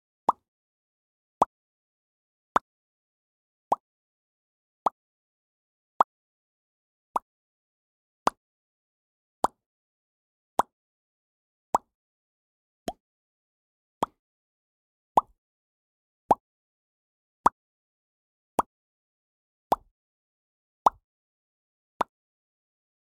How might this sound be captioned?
Bubbles Pop Mouth Lips Smack
Lips, universal-audio, UA, preamp, Pop, Bubbles, Smack, Mouth, sennheiser, studio-recording, shotgun-mic